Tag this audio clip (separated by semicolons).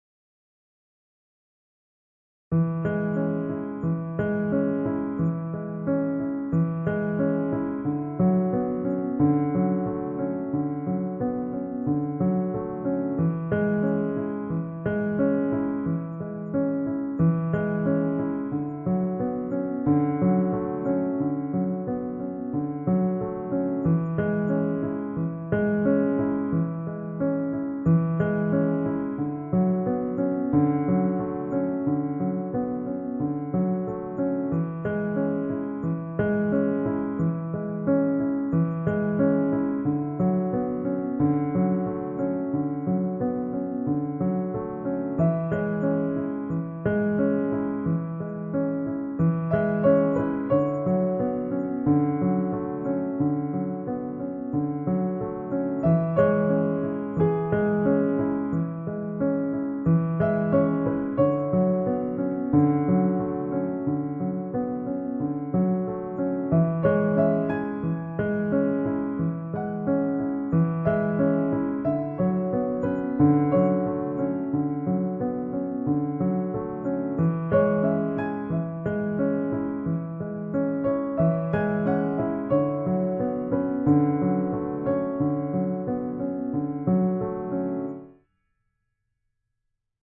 piano; instrumental; nostalgia; childhood; bgm